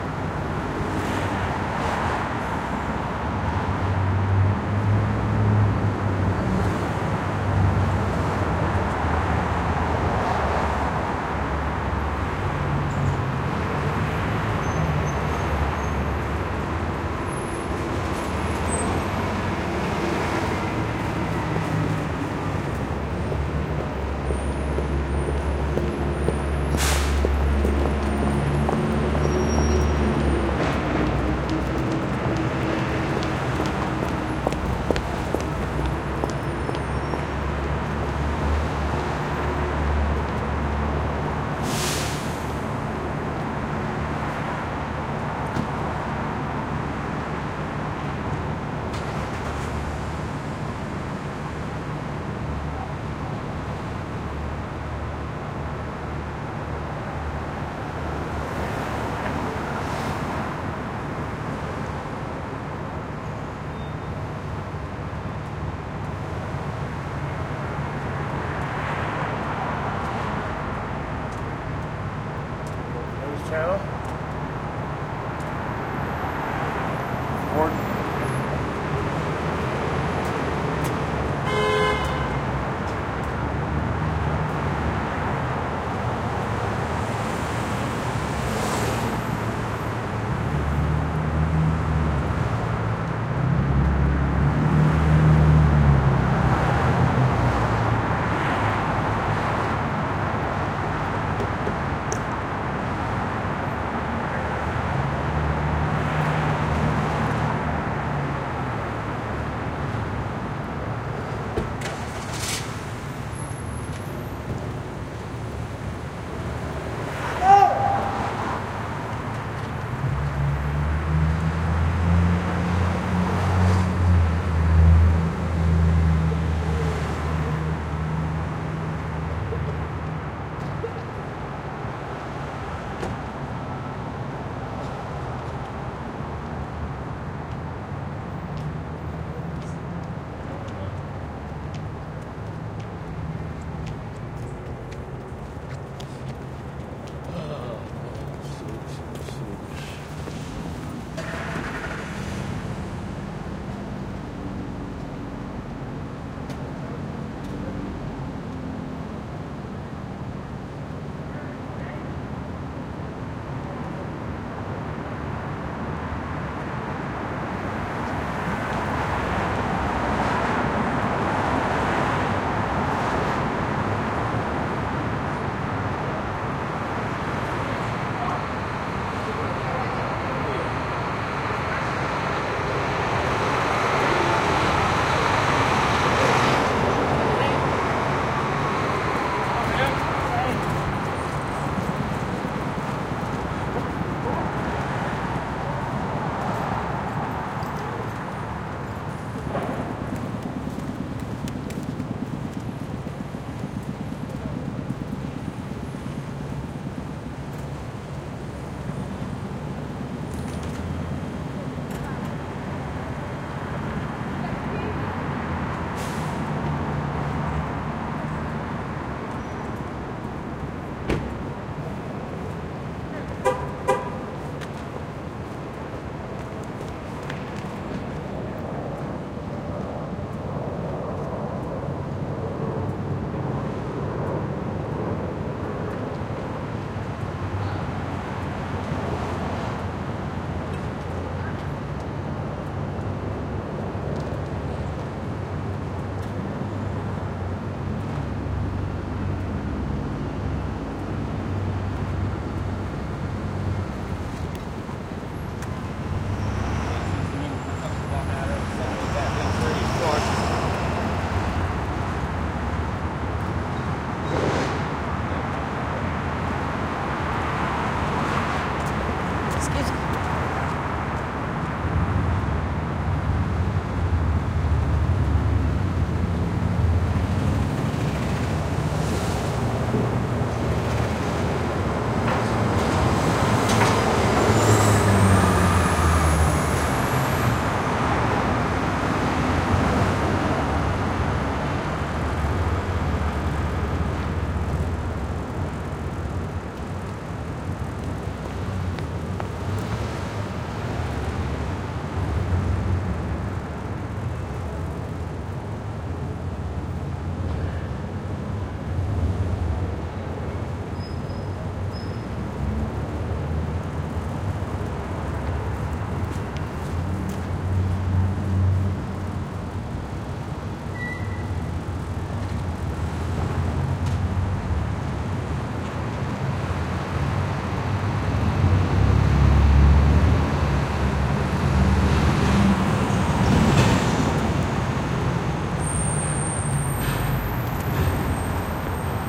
Recorded outside Louisville city hall next to the courthouse. Recorded around 9am on 1/30/2018. Recorded with a Sound Devices MixPre-3 with two Rode NT5's in a X-Y setup at an angle to emphasize the sound of footsteps on the sidewalk.